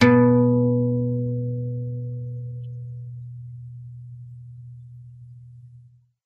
Single note played on an acoustic guitar from bottom E to the next octave E